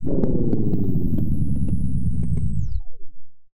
backfiring vehicle right–left
a synthetic vehicle slowing down to stop with backfire, panning from right to left.
auto, automobile, backfire, byke, car, sound-design, synthetic, vehicle